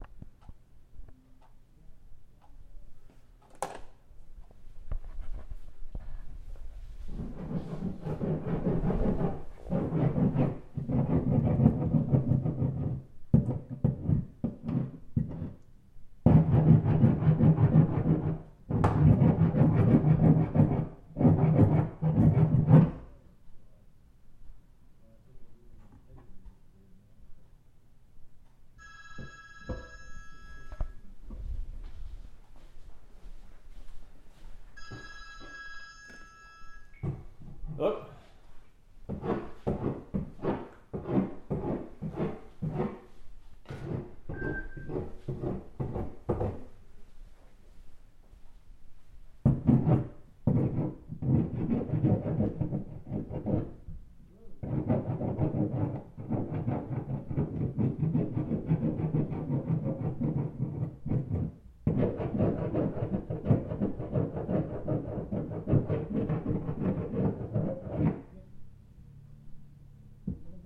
Wall scrapes int perspective BM.L
Metal tool scraping exterior wall, recorded from inside with a Zoom H4.
Field-recording, tool-scrape, Wall-scrape